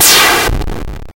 hiss then sizzle
Sounds like a bomb falling quickly, the faintly crackling away.